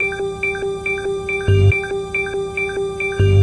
Bend Down (140 bpm)
Tape music, created in early 2011
cut-up, familiar, solar, strings, tape-music